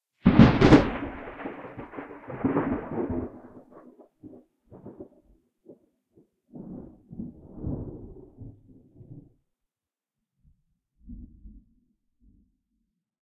Thunderclap 8(Eq,MS,dns)
Thunderclap, without the sound of rain. This thunderclap is part of thunderstorm recording:
The recording was made at night on the veranda of a country house in an open area, near from Ekaterinburg(Russia). Recorded on Tascam DR-05x. This is a version of the recording that does not use dynamic range compression. Enjoy it. If it does not bother you, share links to your work where this sound was used.
Note: audio quality is always better when downloaded.
ambiance, ambience, ambient, atmosphere, field-recording, raindrops, rolling-thunder, sound, thunder-storm, thunderstorm, weather, wind